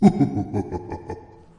Cartoony monster chuckle. I needed something very specific for my game HopSquash! and couldn't find anything here that quite fit as specifically as I needed it to, so I made one myself!
spooky, horror, evil, monster, halloween, cartoony, chuckle, ghost, scary, laugh, haunted